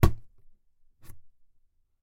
Carton impact 12
Those are a few hits and impact sounds made with or on carton. Might get in handy when working with a carton-based world (I made them for that purpose).
Carton, hit, impact, paper, short, sound-design, sound-fx, stab